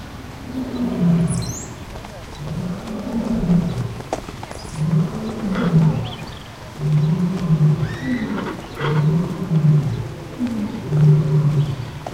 lion distant
Lions roaring, from a distance. Some birds and people walking by, and a kid screaming in the distance towards the end. Recorded with a Zoom H2.
africa, birds, distant, field-recording, lion, roaring, scream, walking, zoo